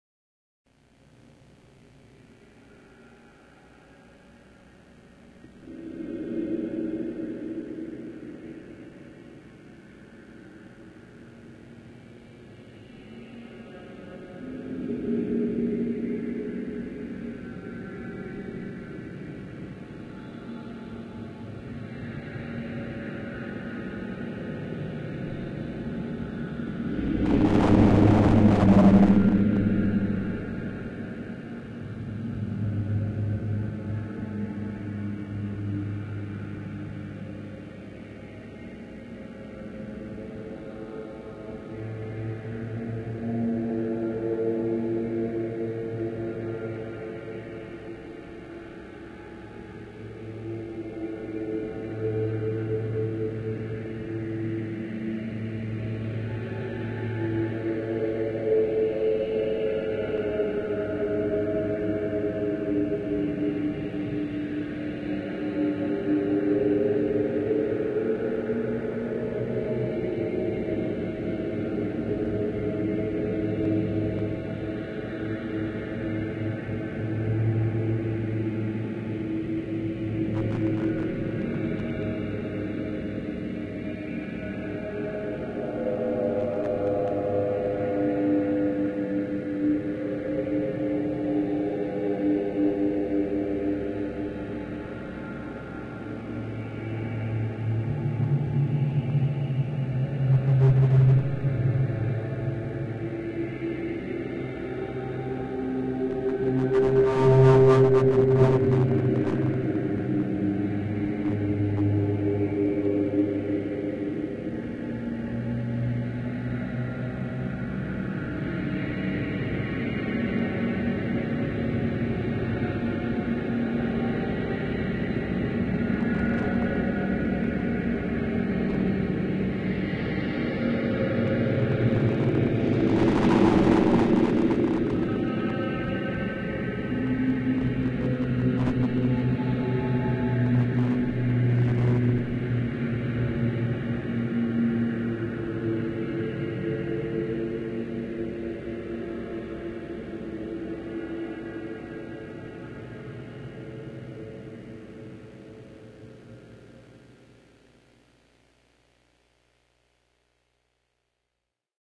Slow Doom Vortex
HOW I DID IT:
JACK Rack 1 FX plugins: guitarix_distortion -> DJ EQ (mono) -> Chorus 2 (based on CSound orchestra by Sean Costello) -> MVerb -> Echo Delay Line (Maximum Delay 60s) -> Simple Amplifier.
JACK Rack 2 plugins: zita-reverb -> Stereo reverb -> Stereo width -> ZynEQ 10 Parametric Bands.
The microphone was 2 feet away from my face as I recorded my wife talking on the phone, while I would from time to time hum with my lips closely together. (If you undertake to make your own drone recording, then be sure to have the pop filter in place, just in case.)
It's a bit light on the bass-end because I thought that users could always add more bass to their taste to suit their purposes, if they wanted; IMHO, it's easier (and sounds better) to add bass to a sound lacking low-end than it is to try to reduce too much bass.
Enjoy!